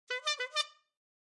Clown Horn 1 1
Clown, Warning, Ring, Horn, Design, Foley, Sound, One, Shot, Alarm, Notification